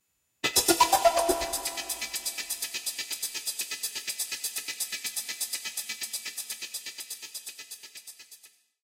weird synth sound effect for intro or video game
loop, weird, intro, low, fun, synthesized, bass, ambient, new-age